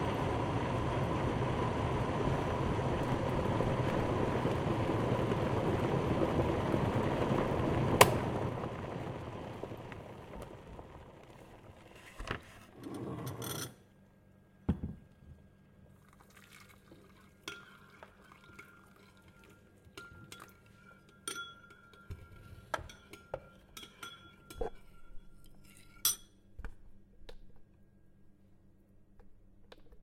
pour-out
The sound from the water will burn well and pour out.
mono, field-recording, water